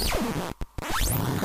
yoyo-tweak
This sound was ripped from a recording session using a circuit bent toy laser gun.
Circuit bent yo-yo sound from my Circuit Bent Sound Pack II.
circuit, glitch, tweak